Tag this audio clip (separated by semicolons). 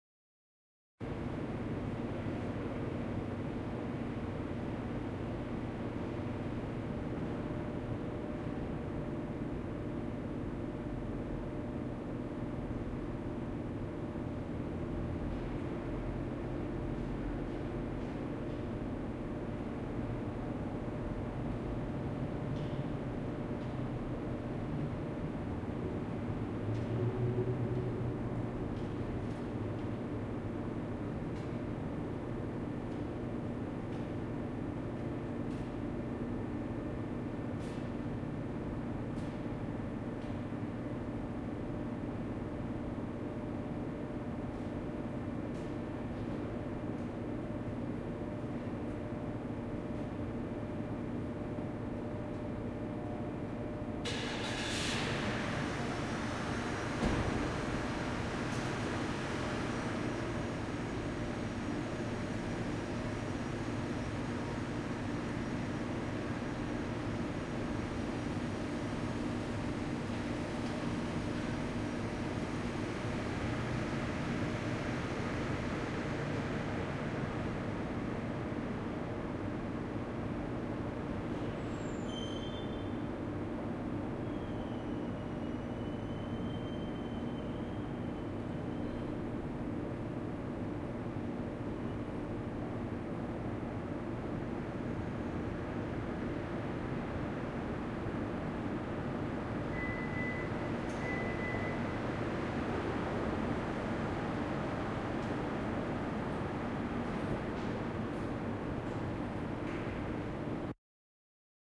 tone; garage; Ambience; room; car